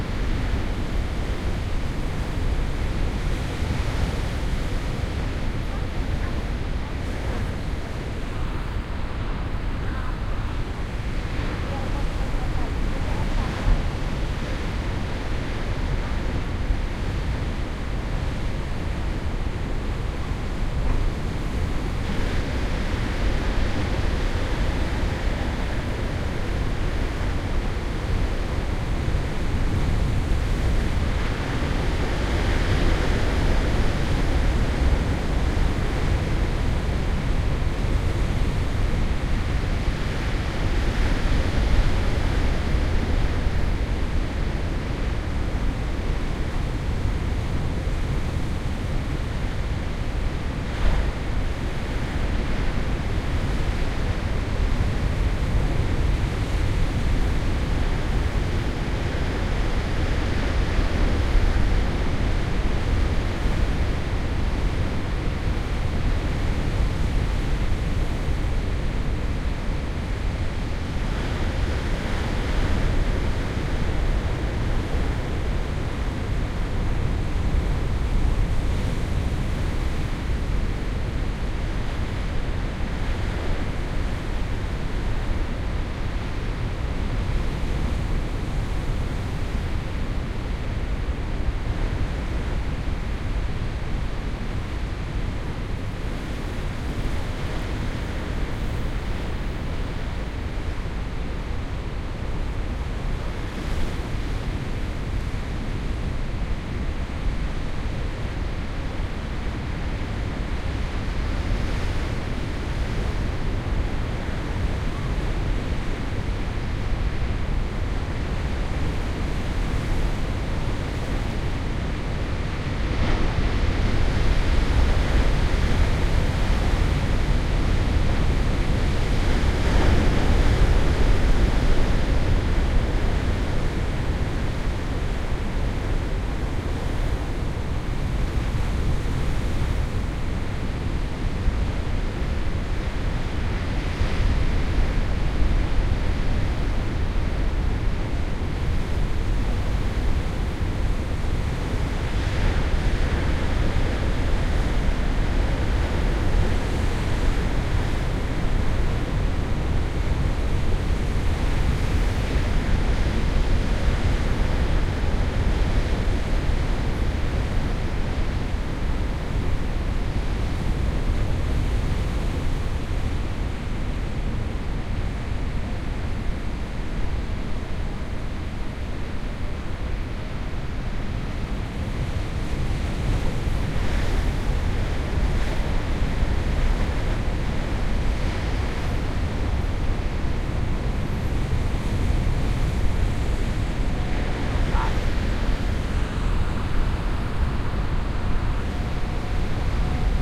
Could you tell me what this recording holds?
porto 22-05-14 waves during a storm, wind .1
Breaking waves in a stormy day with wind, sand beach
rock, sea, tide, atlantic, wind, field-recording, sand, sea-side, waves, binaural, storm, spring, water, surf, wave, beach, ocean